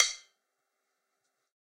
Sticks of God 017
drum, drumkit, god, real, stick